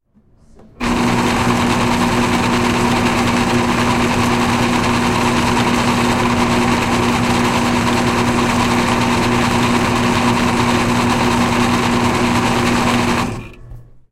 Recording of an air fed paper folder.
industrial, machine, folder